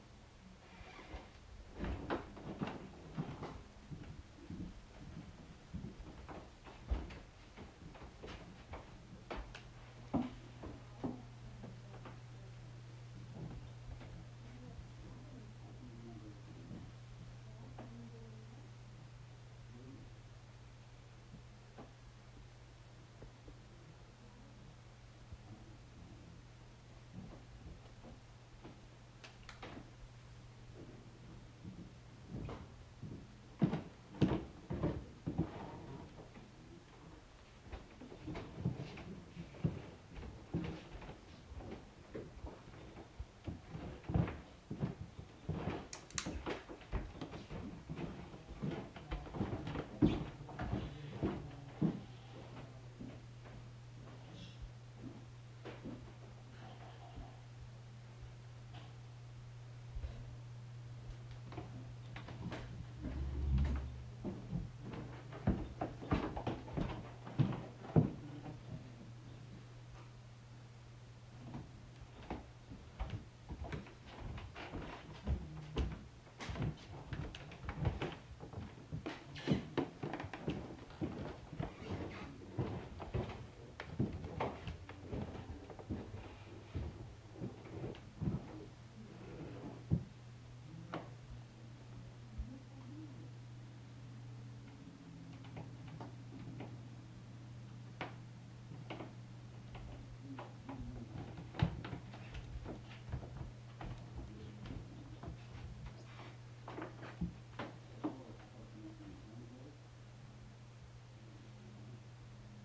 Footsteps and muffled talking from floor above. Mono recording from shotgun mic and solid state recorder.
footsteps-upstairs, upstairs, muffled, footsteps